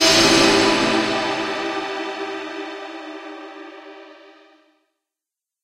A dismal sound intended to emphasize a feeling of horror and doom. This is a sound I created in FL Studio quite a while back. It's mostly synthesized bells, with a string-like tail at the end.
emphasis, hit, horror, intense, jump, panic, scare, shock, startle, stinger, terror